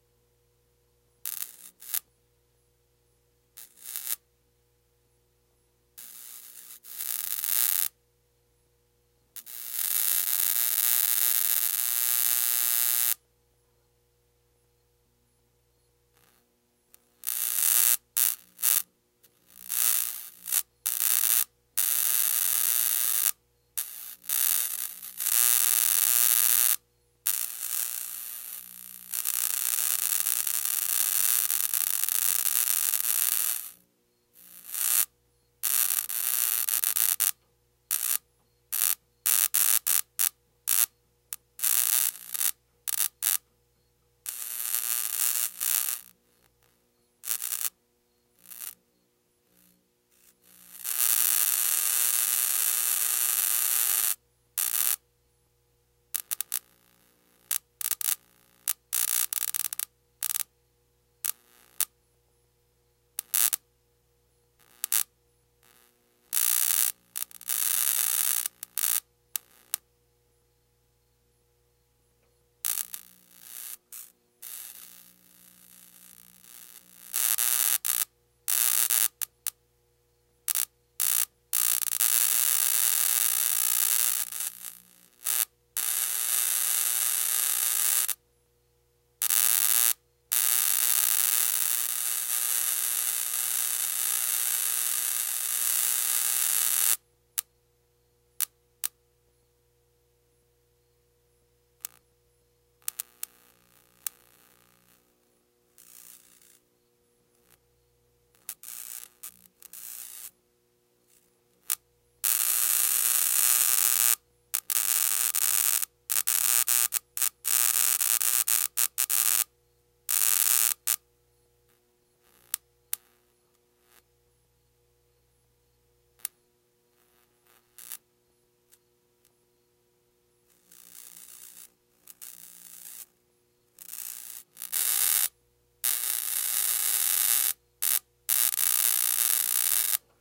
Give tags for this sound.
snap
bug
hum
zapper
buzz
zap
electrocute
electric
electricity

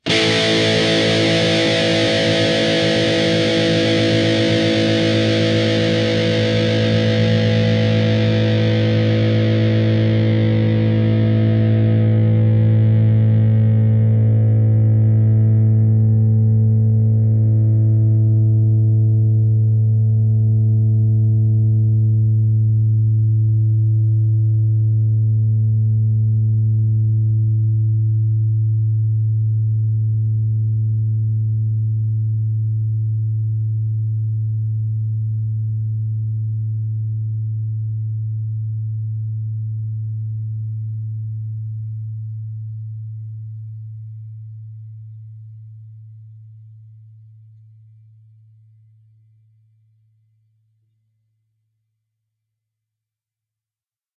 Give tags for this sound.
rhythm-guitar
distortion
guitar
distorted
distorted-guitar
guitar-chords
chords
rhythm

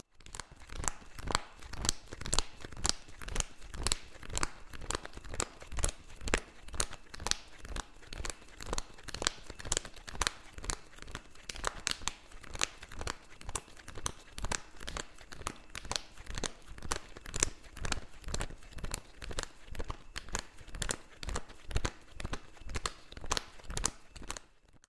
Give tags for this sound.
And
college
reading

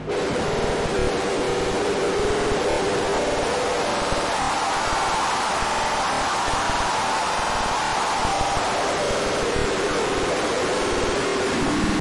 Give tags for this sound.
Alien
Electronic
Machines
Noise

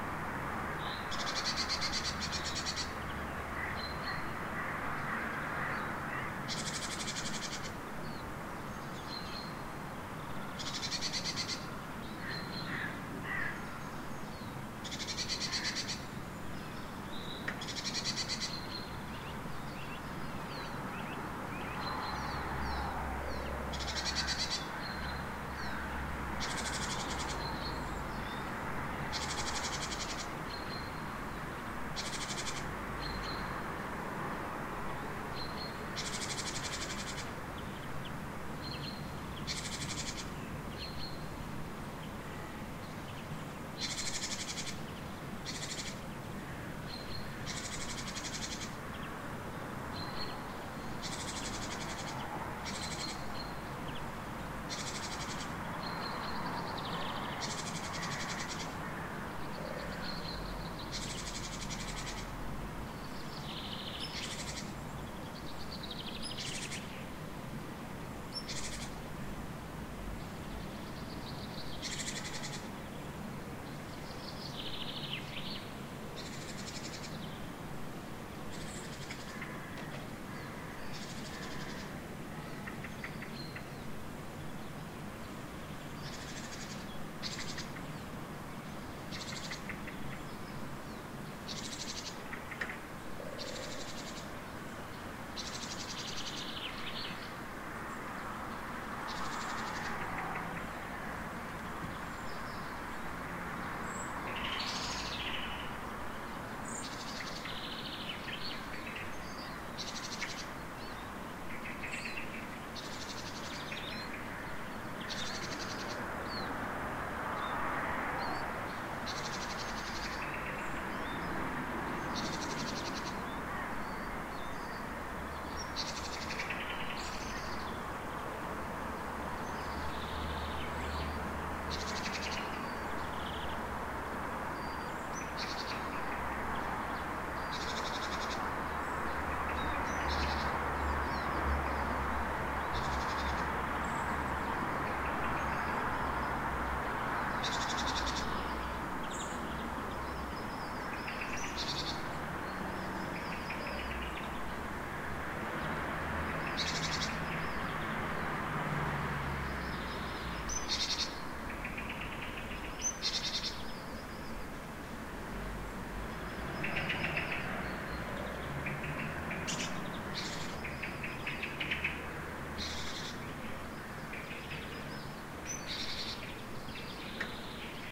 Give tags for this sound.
6channel
morning

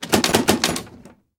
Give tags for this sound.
door
lonng
unlock
lock
close
wood
creak
screen
squeak

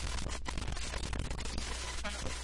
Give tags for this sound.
radio
tuning
noise
fm

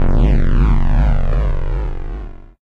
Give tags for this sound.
game
video
movie
cartoon
hide
hiding
animation
film

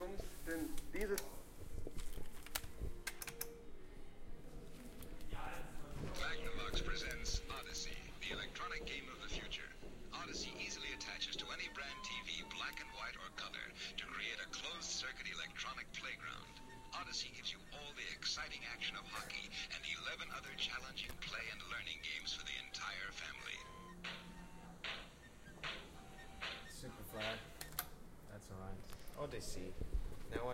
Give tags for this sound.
game
games
play

